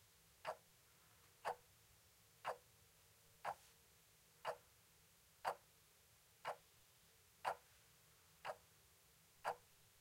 Alarm clock without noisereduktiom
The soft sounding alarm clock on the bedside table. I like it. The microphones are placed about 5 cm apart from the clock.
With no noise reduction.
Recording machine Zoom F4
Microphone 2 Line-audio OM1
software Wavelab
plug-in Steinberg StudioEQ